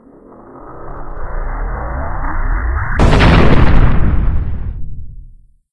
charge up and explode
This is a charged up explosion I made for my project. It is used when a robot
falls on the ground and slows builds up then explode.
I used a laser sound modified it with Audacity, and combine with some smaller explosion and edited explosion sound with audacity
explod, sci, fi, explosive, bomb, explosion